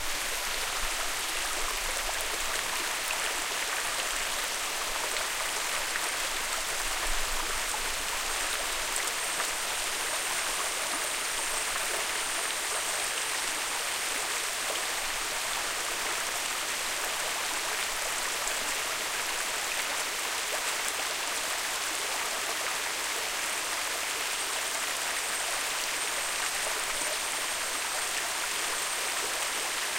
River in the rainforest Kg. Janda Baik Sony MZ-NH700 Sony ECM-DS30P
field-recording, malaysia, rainforest, stereo, river